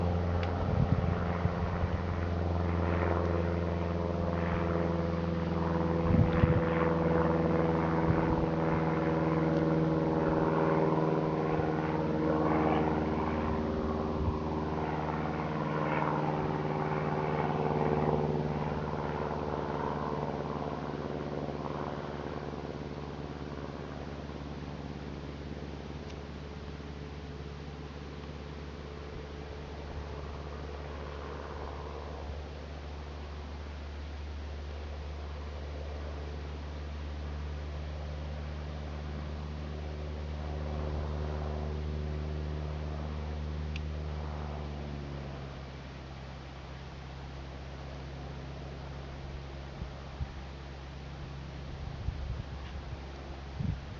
Unedited field recordings of a light aircraft flying overhead in clear and calm conditions, evocative of summer days. Recorded using the video function of my Panasonic Lumix camera and extracted with AoA Audio Extractor.
aeroplane, ambience, field-recording, small-plane, flying, summer, light-aircraft, engine